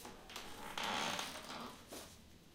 squeaks-loop004
Recorded with AKG D14S and C414XLS, edited loops for effects.
floor, squeaks